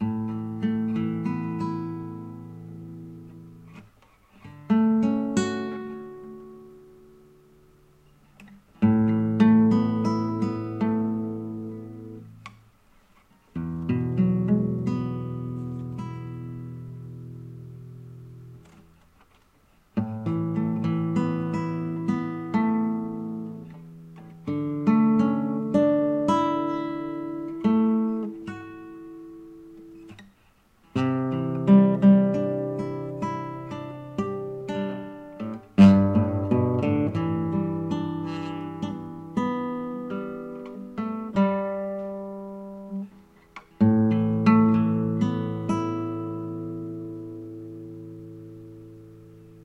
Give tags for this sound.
acoustic clean guitar nylon-guitar

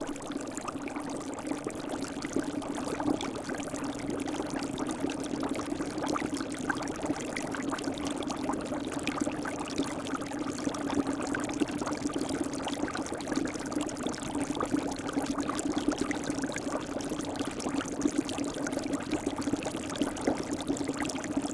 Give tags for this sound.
bubbling dry-ice gurgle halloween trickle water

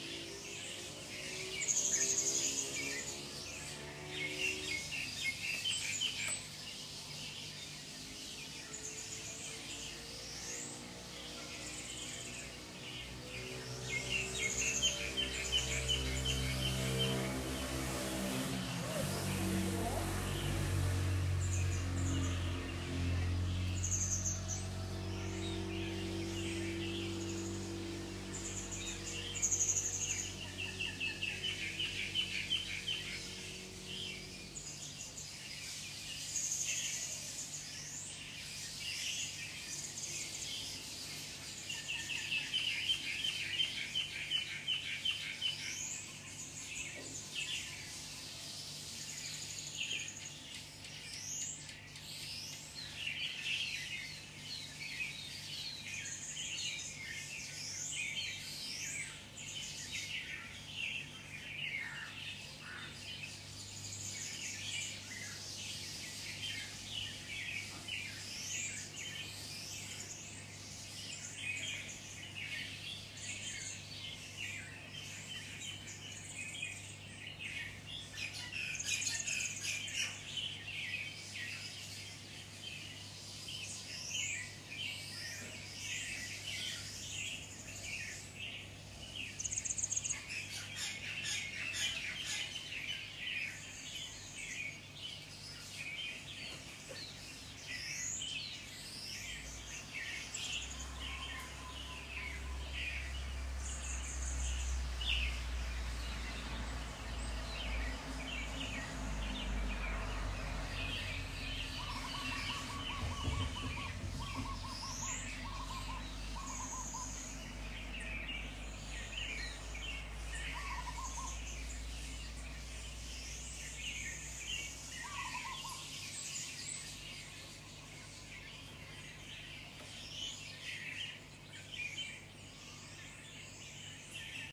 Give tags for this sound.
Africa Birds Morning

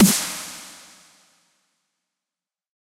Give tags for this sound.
snare skrillex tight compressed punchy

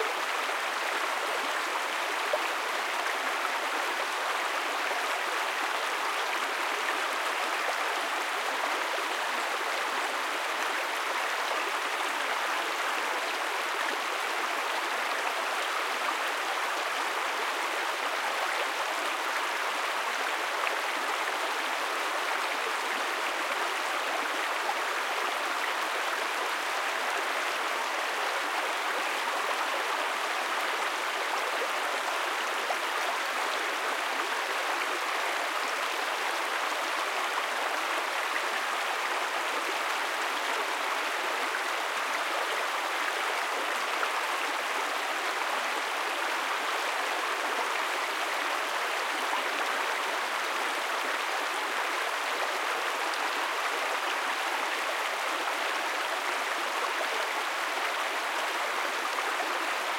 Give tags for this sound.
water-flowing stream perspectives river water

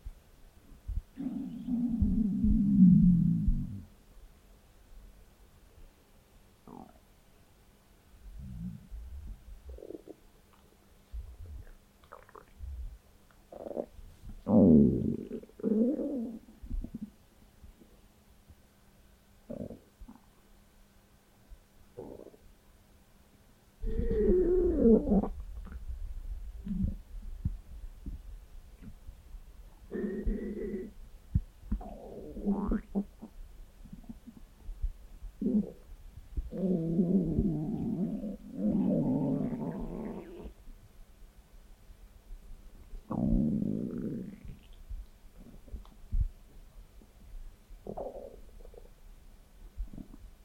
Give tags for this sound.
borborygmus breakfast collywobbles dinner eating food growl hunger hungry lunch peckish stomach

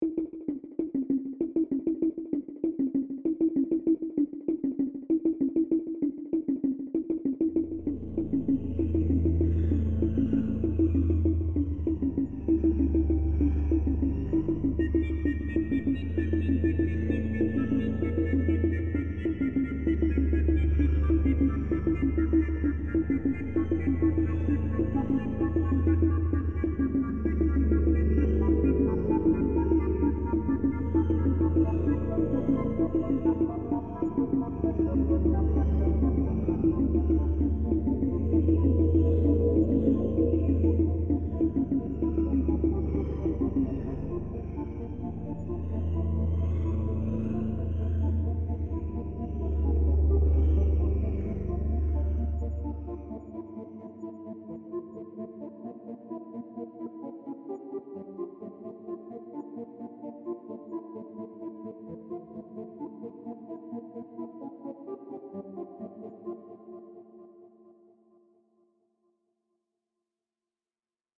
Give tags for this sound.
130bpm chase fight 130-bpm stereo poursuit synth tension modern loop minimalist background vst music

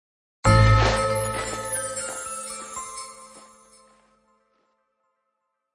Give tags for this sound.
Bells Christmas Sounds Sprinkle

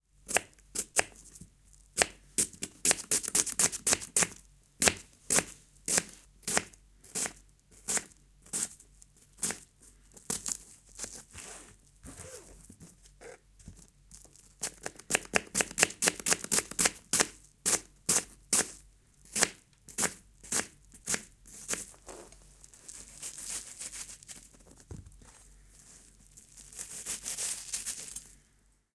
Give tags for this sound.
chef EM172 LM49990 Primo vegetables

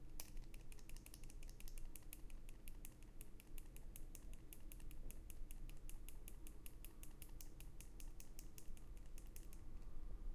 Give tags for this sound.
ink soundfx Pen foley

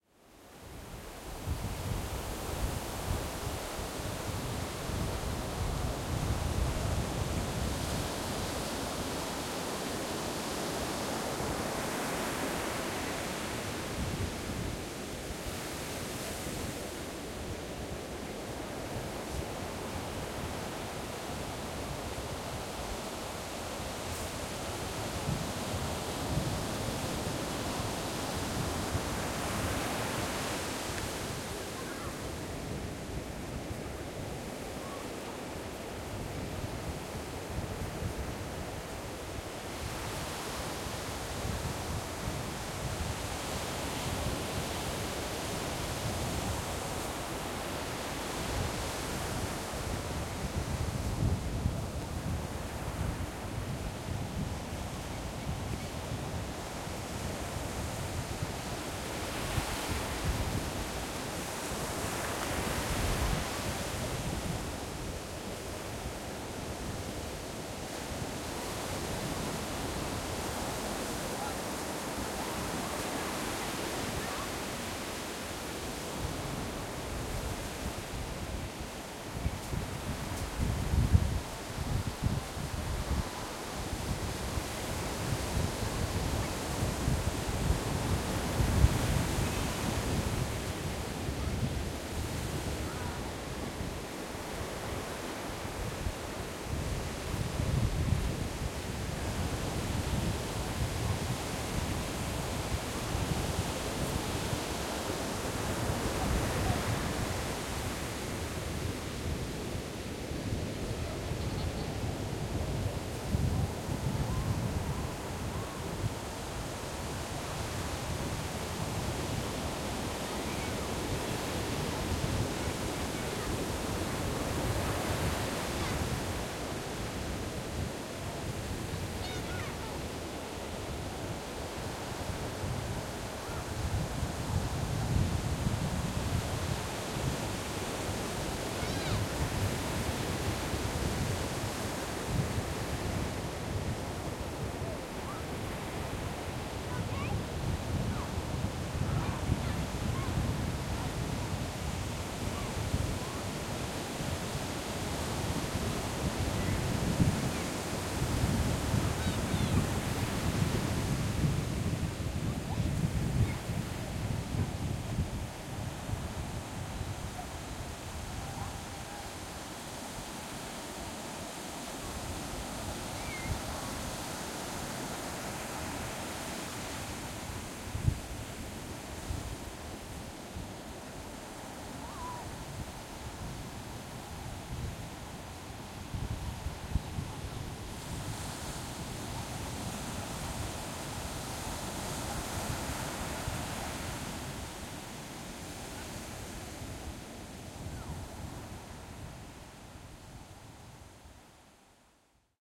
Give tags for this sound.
beach coast field-recording nature ocean sea seaside shore surf water wave waves

